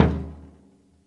samples in this pack are "percussion"-hits i recorded in a free session, recorded with the built-in mic of the powerbook
bass-drum, bassdrum, drums, unprocessed